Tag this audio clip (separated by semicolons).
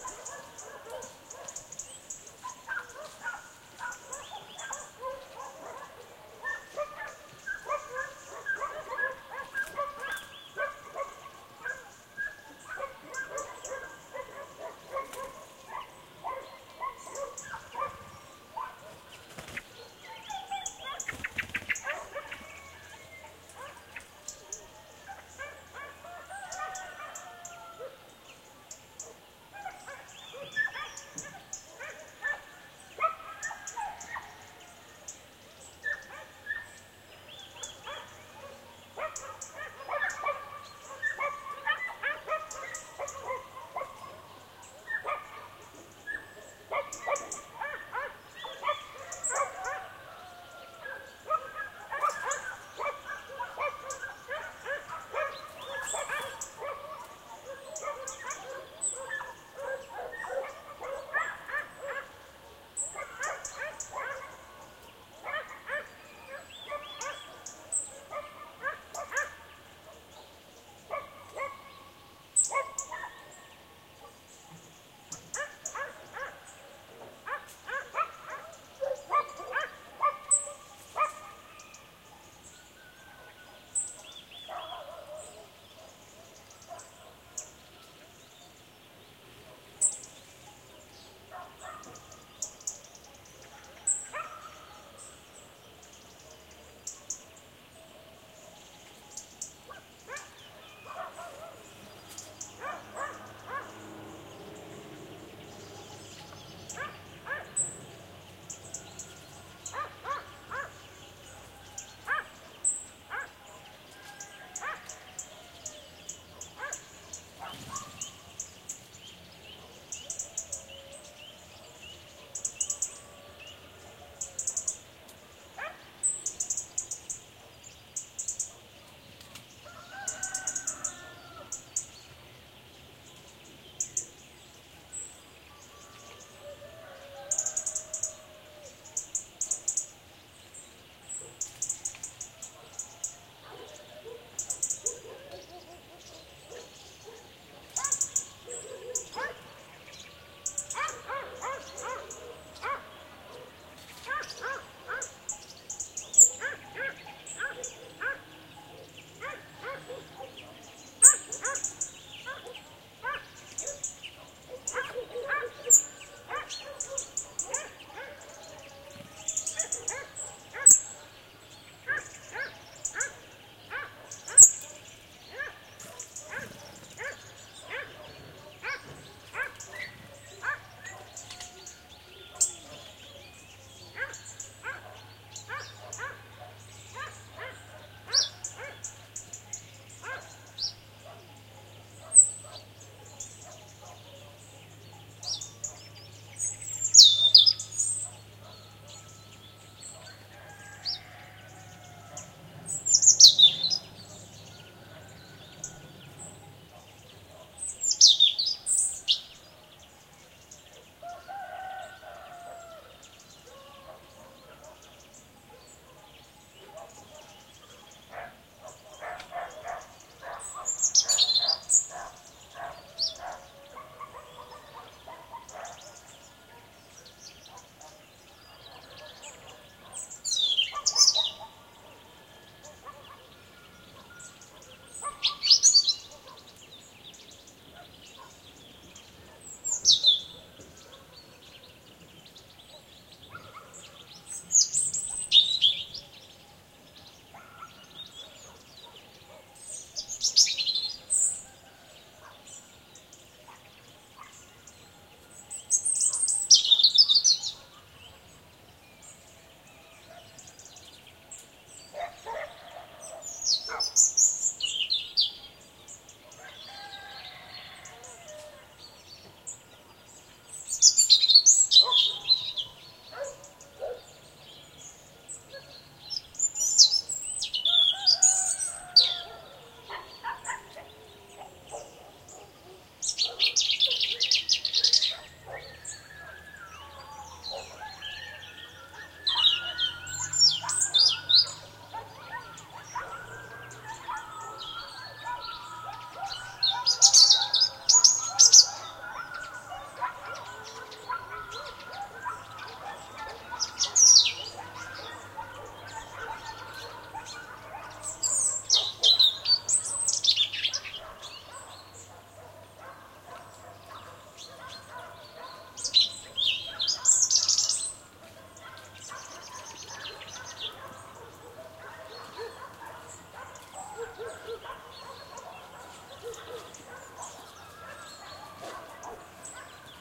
rural; barkings; nature; countryside; ambiance; field-recording; village; farm; autumn; birds